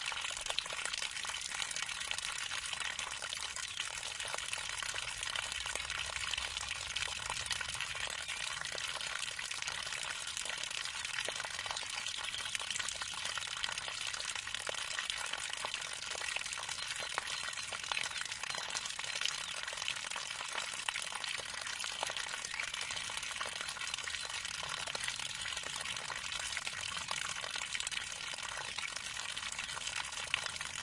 20070406.spurt.raw
water from a hose falls to the ground, some bird tweets in background. Sennheiser ME66+MKH30 into Shure FP24, into iRiver H320.
nature, field-recording, water, spurt, pee, urinating, liquid, stream